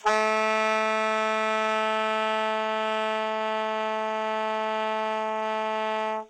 The A3 note played on an alto sax